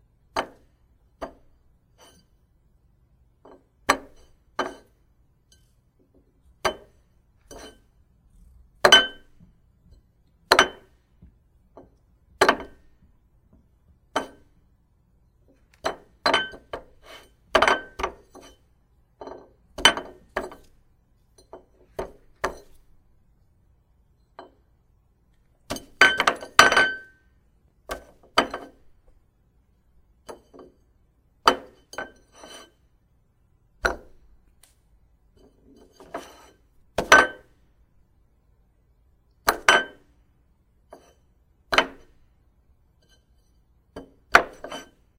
Ceramic Plate Sounds
Sounds of using a plate while eating.